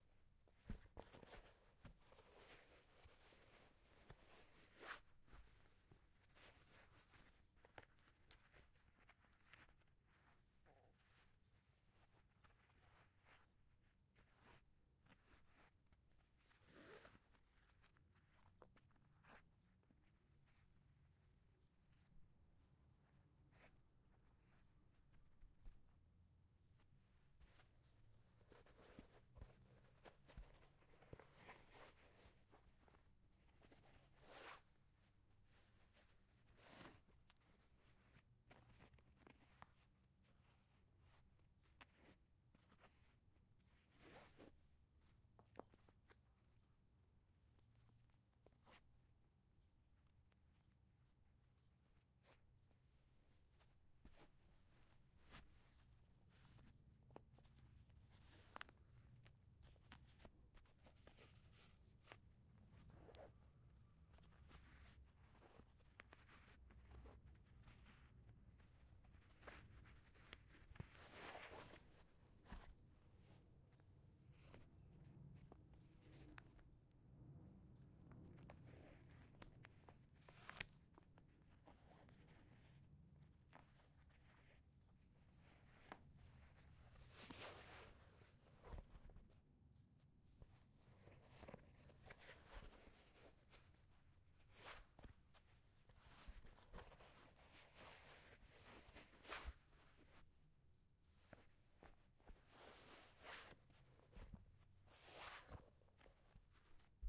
Taking Takkies on and off
Taking shoes on and off , and lacing them up and down, recorded with a zoom H6
laces, off, OWI, shoe, shoe-laces, sneakers, taking, takkies, trainers